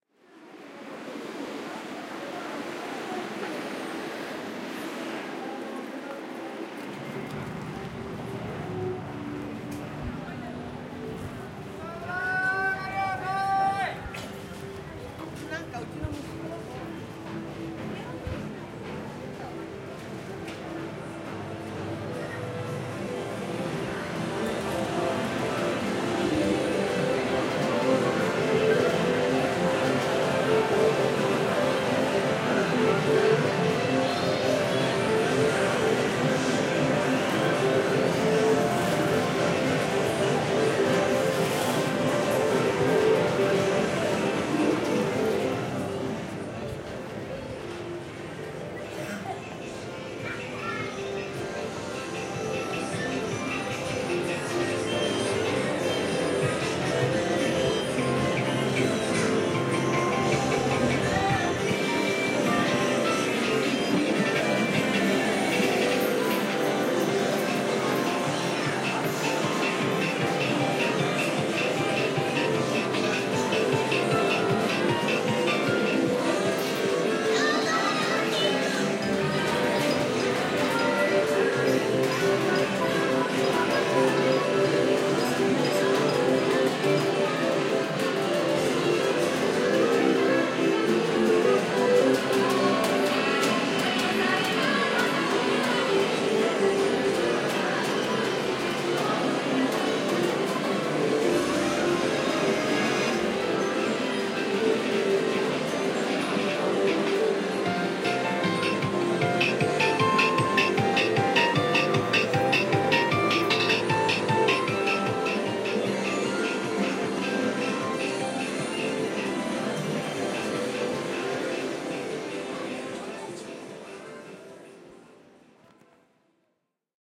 Tokyo - Mall 1
Walking past a pachinko parlour then into a Tokyo shopping mall. Lots of swirling ambient sounds, snatches of radios, toys and TVs. Sounds like it could be processed but isn't. Recorded in May 2008 using a Zoom H4.